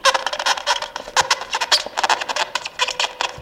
unicel frotado rápidamente con plástico